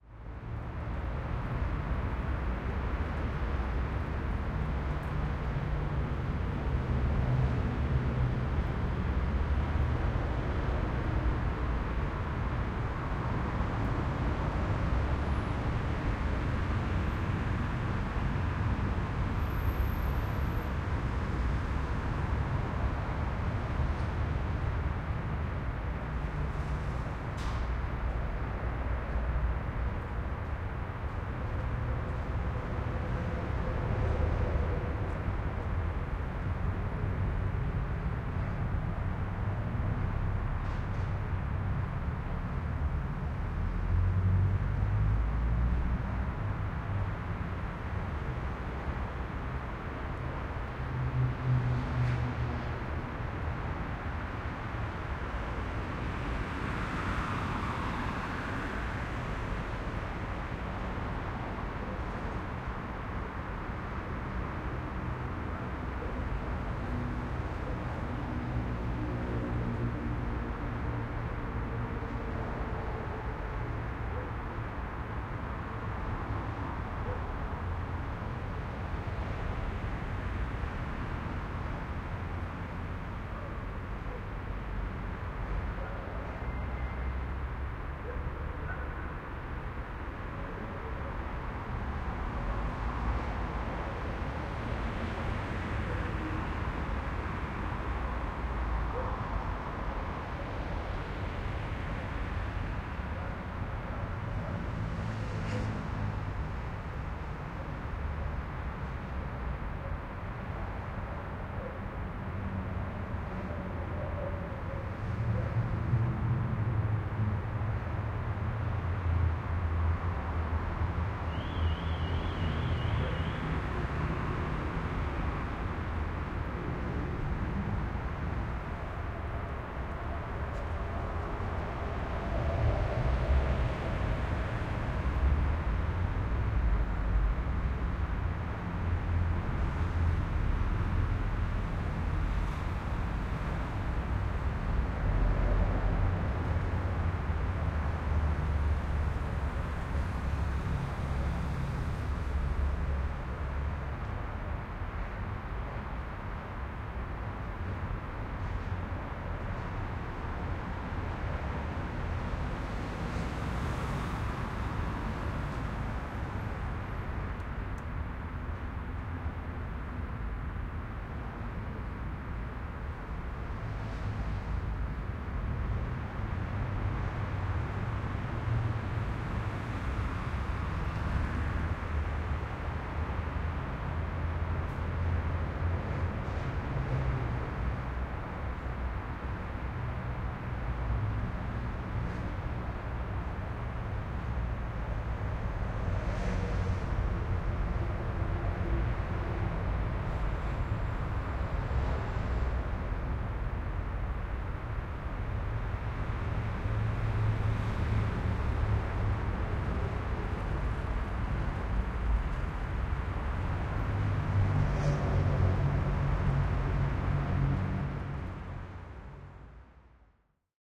Highway Far Perspective
Cars, Far, Highway, Motorway, Perspective, Road, traffic